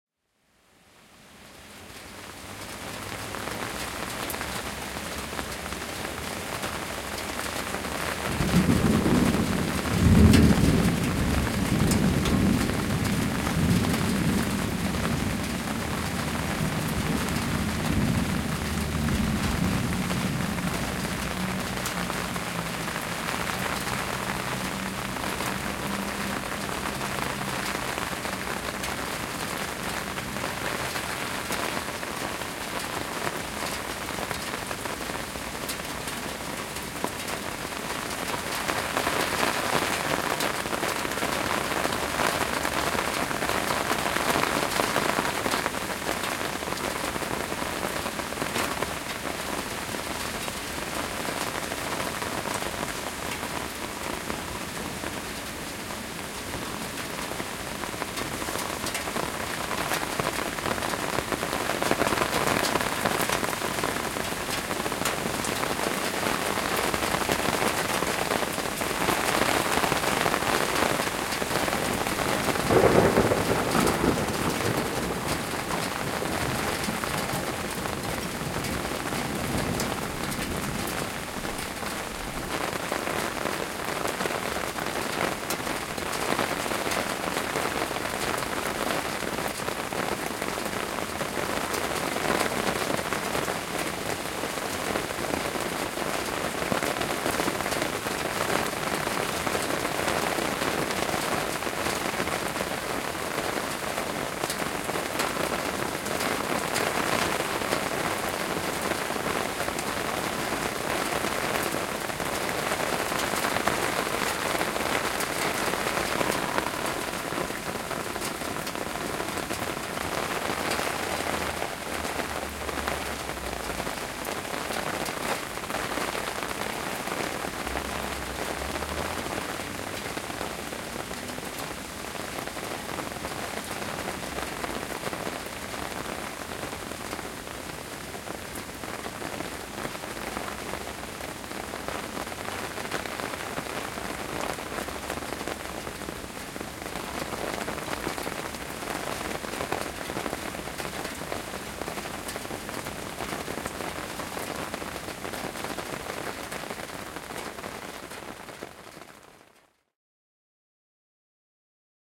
Rainfall in Montreal
Zoom H4N Pro
field-recording, rain, rainfall, shower, thunderstorm